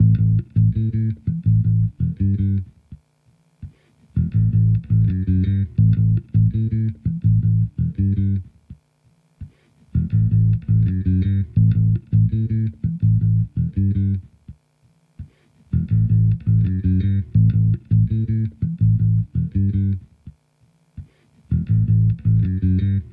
Aminor Funk BassGroove 83bpm
Aminor_Funk_BassGroove_83bpm
Bass Guitar | Programming | Composition